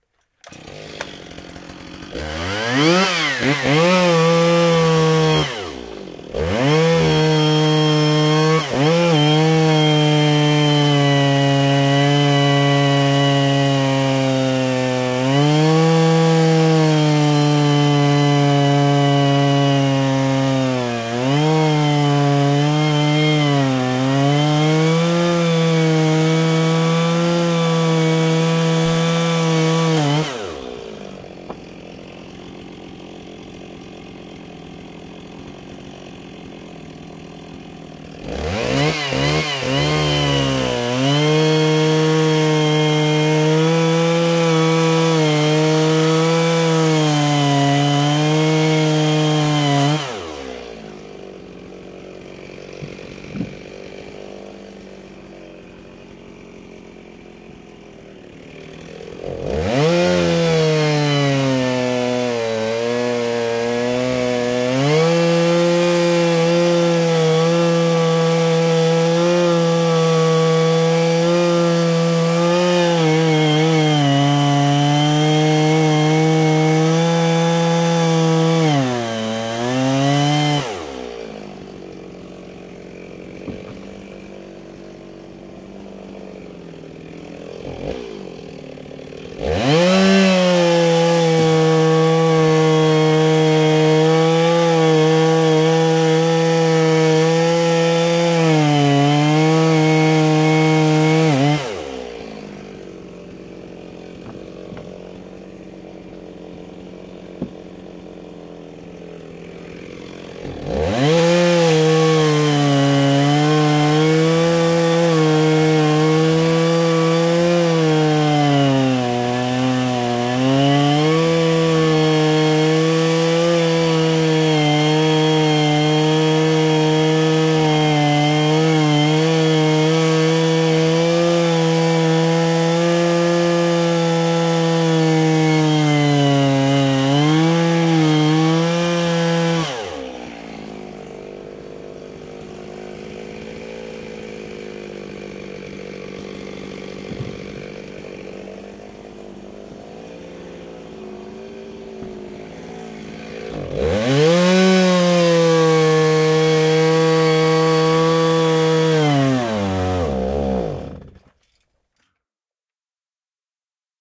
Chainsaw Crosscutting 2
A stereo field-recording of a chainsaw crosscutting dry hardwood branches, the saw runs out of fuel at the end. Rode NT-4 > FEL battery pre-amp > Zoom H2 line in.